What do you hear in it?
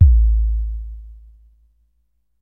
Nord Drum BD 1
Nord Drum mono 16 bits BD_1